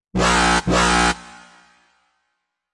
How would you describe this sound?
DnB&Dubstep 010
DnB & Dubstep Samples